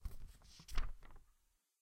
A clean sound of a page turning